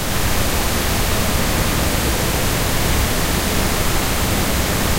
independent pink noise verb
Independent channel stereo pink noise created with Cool Edit 96. Reverb effect applied.
pink, noise, stereo, reverb